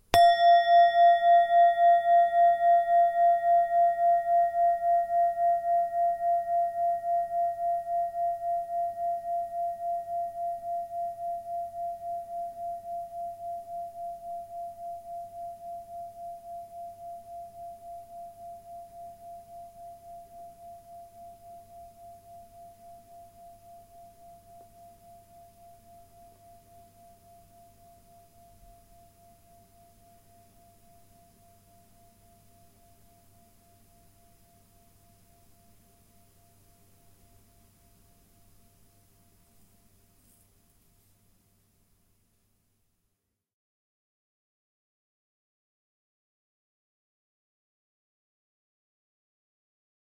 Singing Bowl 3 (small)/ Klangschale 3
Just a simple, clear singing bowl :)